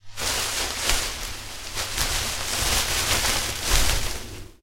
HOT SIGNAL.Leaf sounds I recorded with an AKG c3000. With background noise, but not really noticeable when played at lower levels.When soft (try that), the sounds are pretty subtle.
bush, shrubbery